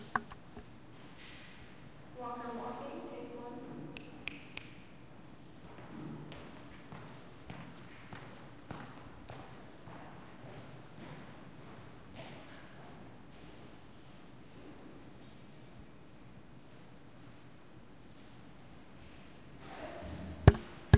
Stereo, H4N
Hardwood flooring, in a large art gallery room. High ceilings. Two people in the rooms
gallery, Walking